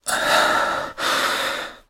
Breath Scared 08
A male agitated scared single breathing sound to be used in horror games. Useful for extreme fear, or for simply being out of breath.
sfx, breath, games, fear, gaming, horror, video-game, male, rpg, indiegamedev, gamedeveloping, videogames, frightful, scared, scary, indiedev, terrifying, breathing, epic, fantasy, game, frightening, gamedev